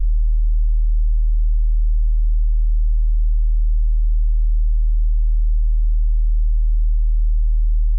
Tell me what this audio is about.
fx-bass-01
Deep bass fx.
fx, bass